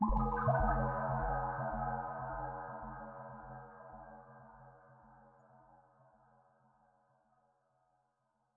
Result of a Tone2 Firebird session with several Reverbs.